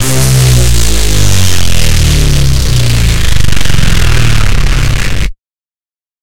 Reece Drop (Without Delay) 2
Stab, Electric-Dance-Music, DRM, Snare, Analog, Synthesizer, Kick, Electronic, Bass, 4x4-Records, Synth, Closed, 1, Sample, Off-Shot-Records, House, Beat, Drum, TR-606, EDM, Open, Loop, Drums, Vermona